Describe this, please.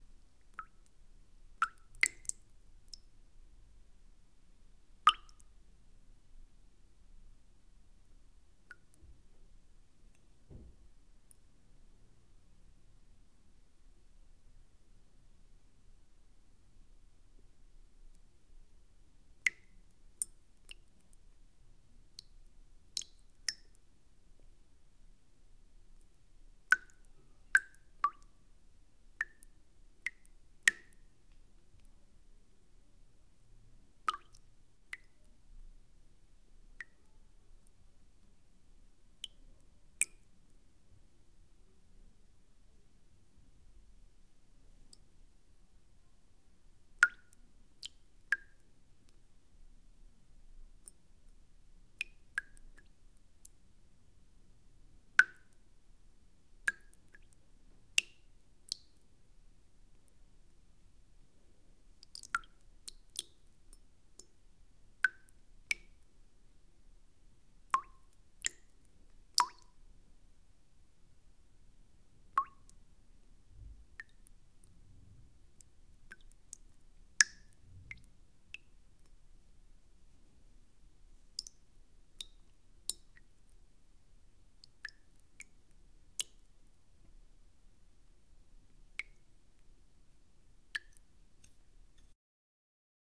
Water drops 4
Some water drops in a bowl, made manually with a spoon (and love).
Recorded on a Zoom H4N and a large membrane cardioid mic.
water, bowl